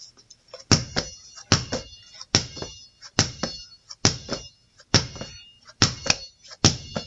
Gym Sounds
Bouncing a basketball
loud, basketball